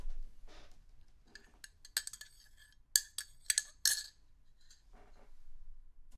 Stirring in coffee
Stirring in a cup of coffee. Self-recorded.
spoon, coffee, mug